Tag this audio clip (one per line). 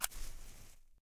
scratch
light
match